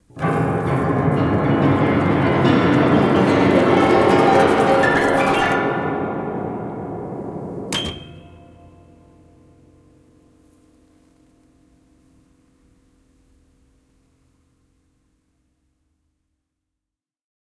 broken piano, zoom h4n recording